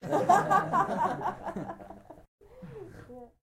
Small group of people laughing 2
Small group of people chuckling.
Recorded with zoom h4n.
chuckle, group, laugh, laughs, people